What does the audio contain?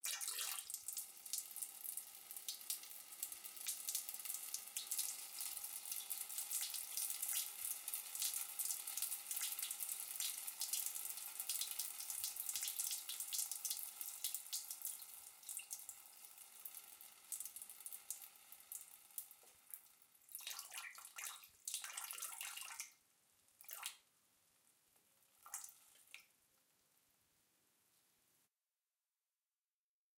Male person urinating in toilet. Small room.
lavatory, pee, toilet, urinate, urine